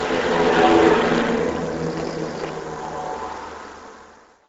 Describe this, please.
Other than cutting, slicing-- no effects were applied. A wooshy-mechanical sound.
Effect, Effects, Machine, Machinery, Mechanical, ToiletTrollTube, Woosh